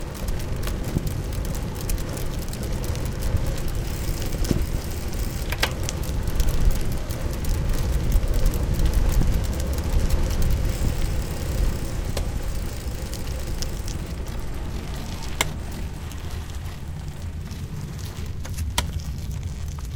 Taking a ride on a comfortable city bike.
Recorded with Zoom H2. Edited with Audacity.